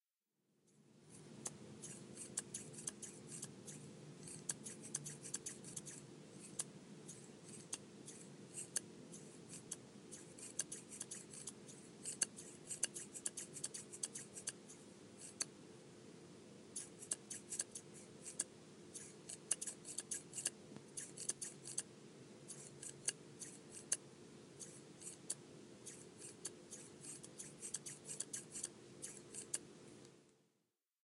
Very sharp haircutting scissors snipping away.